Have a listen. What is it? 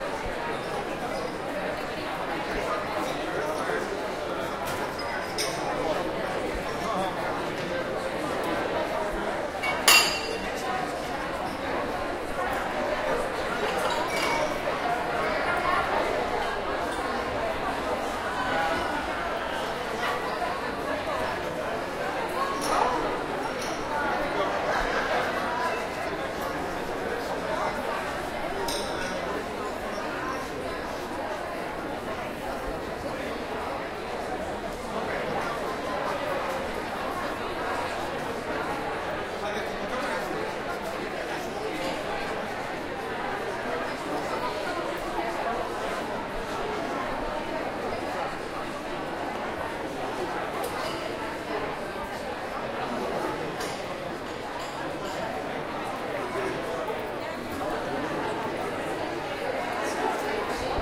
Field-recording of a crowd during a break at a theater show.
Nice sounds of china and glassware.People chatter and mumble.
Recorded at De Doelen theater Rotterdam, Netherlands with Zoom H1 recorder.